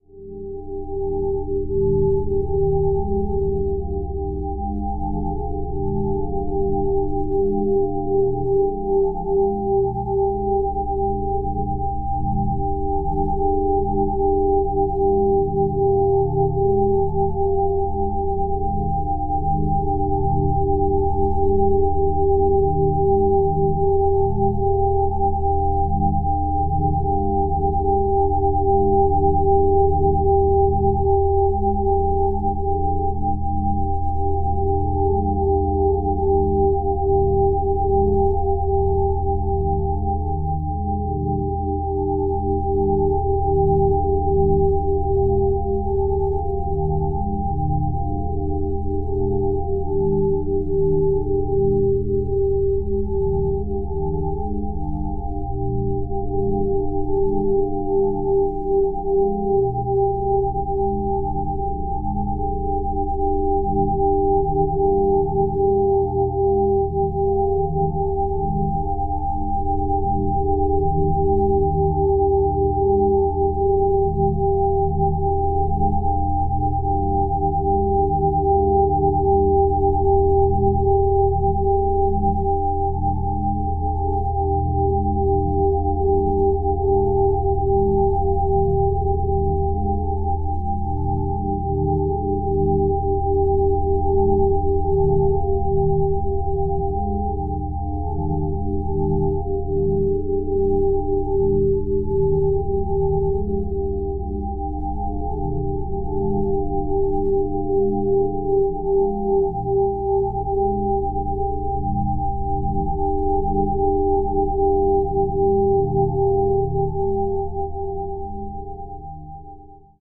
This sample is part of the "SineDrones" sample pack. 2 minutes of pure ambient sine wave. Resembling singing bowls, but these are purely synthetic.